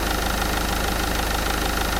Motor Loop 3
engine of a diesel van recorded with a ZOOM H2, suitable as a loop
bus, car, diesel, engine, loop, motor, running, van